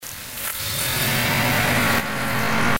sound-design created from heavily processing a field-recording of water
recorded here in Halifax; subtle panning; made with Adobe Audition